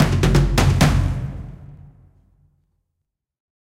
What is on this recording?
african loop004 bpm130 b
percussion, loop, african